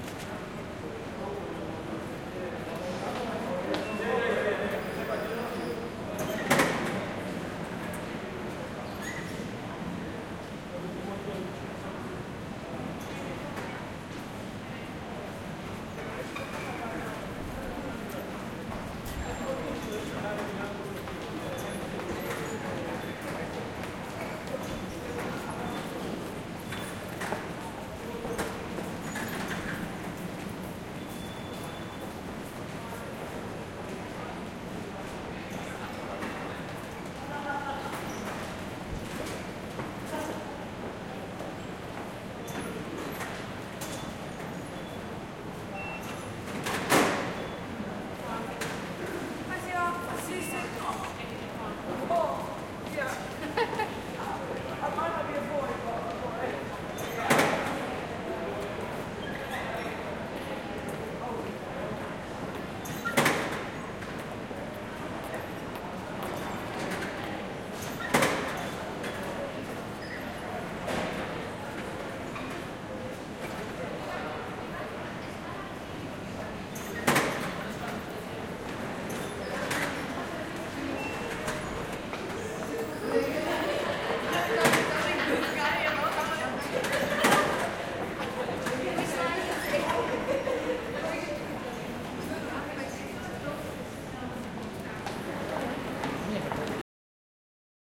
Recording made on 17th feb 2013, with Zoom H4n X/y 120º integrated mics.
Hi-pass filtered @ 80Hz. No more processing
Interior from green park underground station on london. in front of control doors